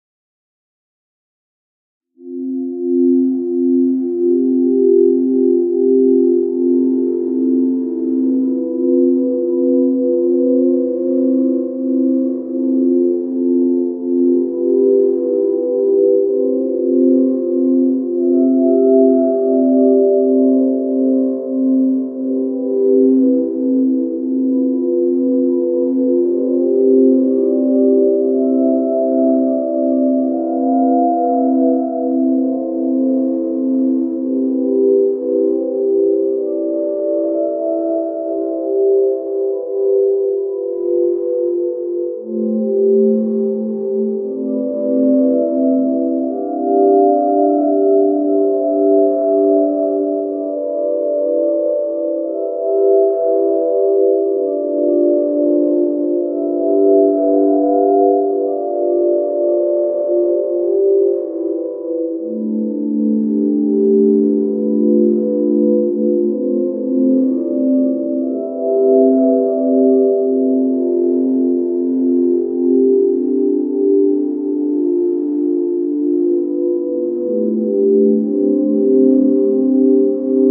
Atmospheric harmonies with the Helm synth, sequenced with Ardour.